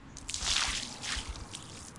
Water splashing on ground.
Ground, Water